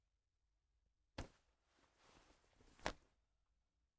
Grab close 1
cloth-grab, grab, cloth